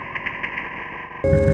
backwards sound, ending with tone
beep, computer, echo, processed, sample, static, tone